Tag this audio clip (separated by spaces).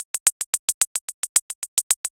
hat hi loop